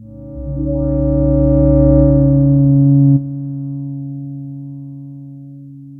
tubular system E1
This sample is part of the "K5005 multisample 11 tubular system" sample
pack. It is a multisample to import into your favorite sampler. It is a
tubular bell sound with quite some varying pitches. In the sample pack
there are 16 samples evenly spread across 5 octaves (C1 till C6). The
note in the sample name (C, E or G#) does not indicate the pitch of the
sound. The sound was created with the K5005 ensemble from the user
library of Reaktor. After that normalizing and fades were applied within Cubase SX.
bell, experimental, multisample, reaktor, tubular